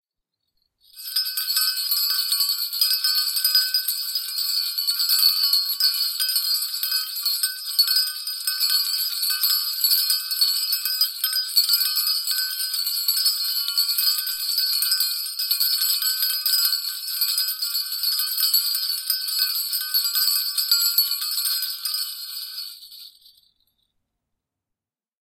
Sleigh Bells, Long, A
Raw audio of jangling sleigh bells (well, they were actually a collection of cheap, small bells bundled together, but it worked nevertheless!). The bells settling once stopped has been left in.
An example of how you might credit is by putting this in the description/credits:
The sound was recorded using a "H1 Zoom recorder" on 17th September 2017.
Bell, Jangle, Bells, Sleigh, Ring, Ding, Jingle, Ringing